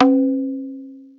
maadal-01-taang
Recorded by the author! ताङ्ग
Microphone: lavalier microphone
Side of Maadal: Smaller Side
Fingers used: Index only
Hit type: open sound (leave the membrane right after hitting it)
Wikipedia Introduction:
taang, B-Scale